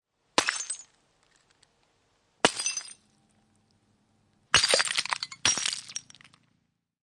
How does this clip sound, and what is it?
Pullo, lasipullo rikki / Glass bottle breaks on the street, a few versions
Lasipullo rikotaan kadulle muutaman kerran, kilahduksia.
Paikka/Place: Suomi / Finland / Nummela
Aika/Date: 17.08.1988
Bottle, Break, Chink, Fall, Field-Recording, Finland, Finnish-Broadcasting-Company, Glass, Kilahdus, Lasi, Pudota, Pullo, Rikki, Rikkoutua, Shatter, Soundfx, Suomi, Tehosteet, Yle, Yleisradio